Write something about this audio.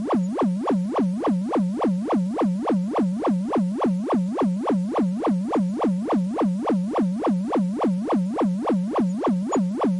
Alarm SFX
A 10 second loop of an alarm sound
Made in Adobe Audition
Caution, Alarme, Cuidado, Danger, Alert